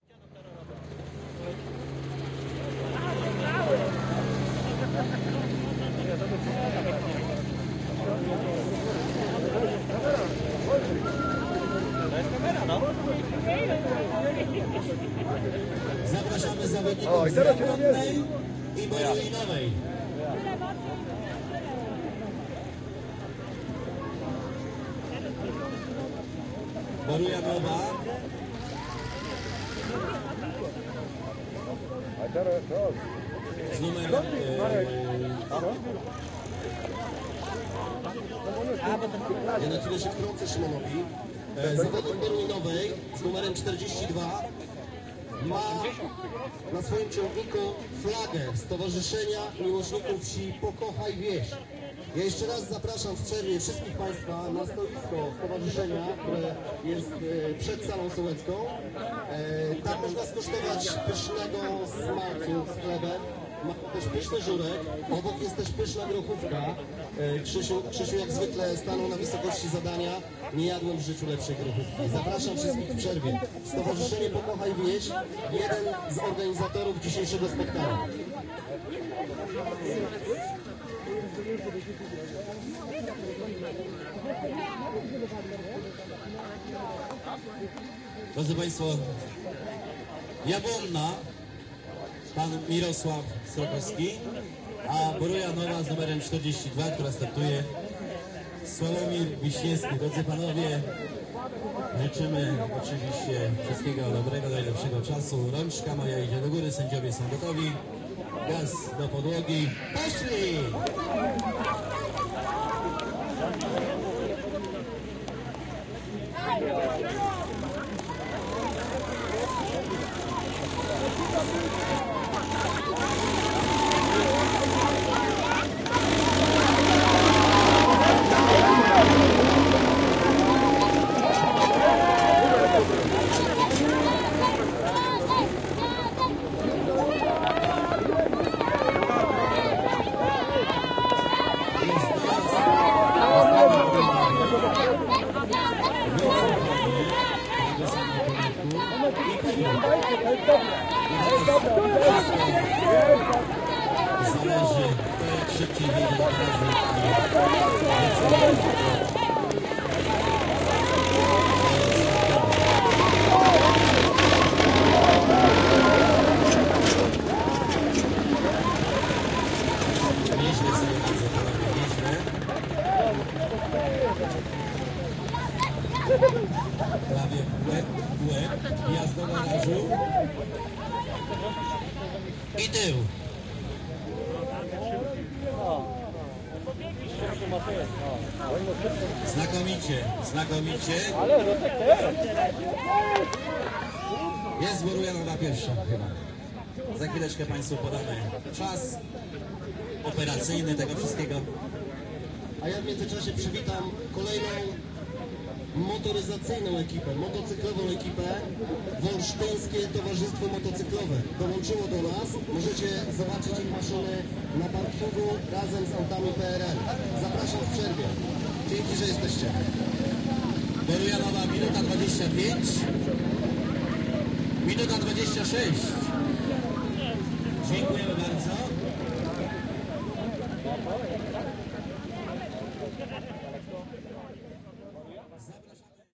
111014 tractor race category modern002
the First Majster Trak - race of tractors in Wola Jablonska village (Polad). The event was organized by Pokochaj Wieś Association.
Recorder: marantz pmd661 mkii + shure vp88